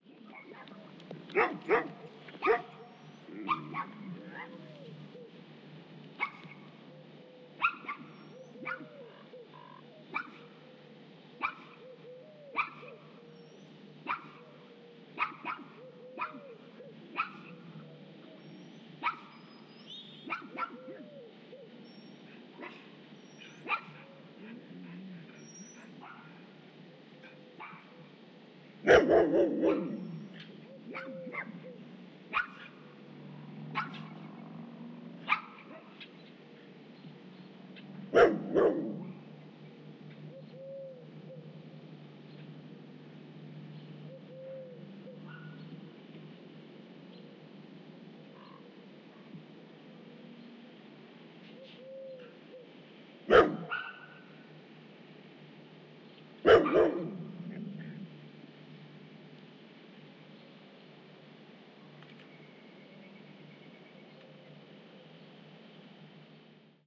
ANML S Ext Dogs Barking1
A couple dogs barking in the early morning. Recorded in stereo on a Fostex FR2Le with an AT BP 4025 stereo mic.
pets, barks, barking, animals, dog